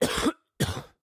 This is one of many coughs I produced while having a bout of flu.